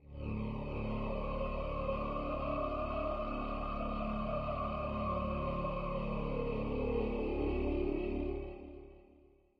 Dark Breath Pad
A slightly evil 'breath-like' pad made with Crystal AU
dark, pad, trance, voice, vox